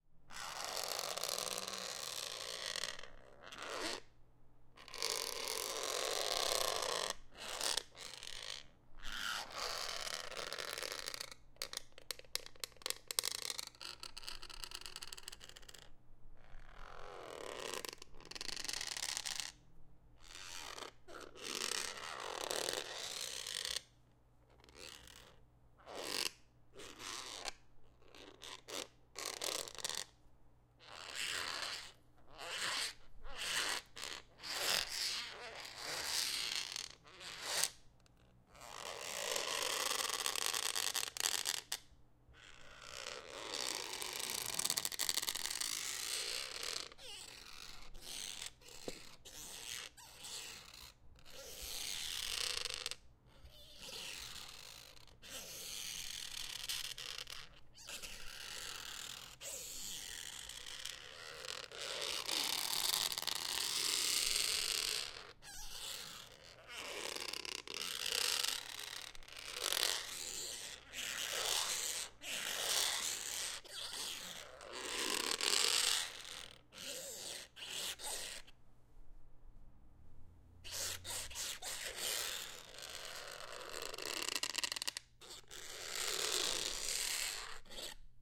Household, Lamp - Reading Lamp Opposable Neck Bending, Torsion, Creaking
Foley recording of a goose-neck reading lamp lamp (you can bend it into any position and it will stay that way) mounted to a bed in a hotel room. Features a lot of groaning, clicking, bending, stretching, etc. Could be used for rope torsion sfx, pitched down for metal stress / strain / fatigue, clicking elements could be used for creature sounds.